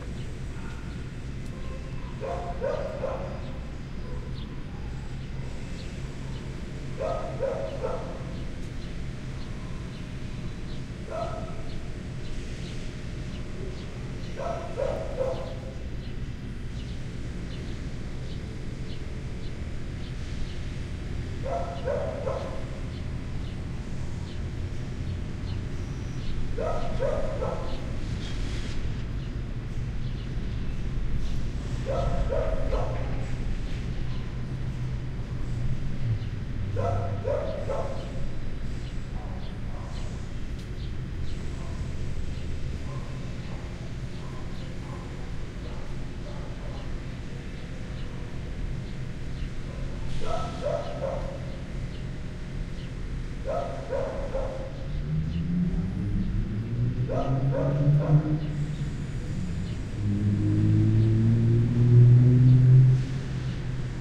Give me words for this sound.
Dog Barking Inside Building, Busy Street, Construction
I am standing outside a pet grooming salon. A dog is barking inside. Cars and construction in the background.